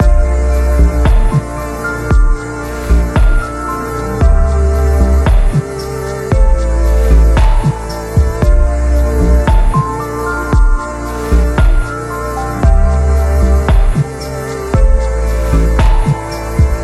CHILL OUT BELL LOOP 114BPM
I did this bell influenced instrumental loop with a chill out flavour with no specific purpose in mind. Maybe you can find it useful for your project. Enjoy!
lowkey, bells, synthwave, loop, tech, chillout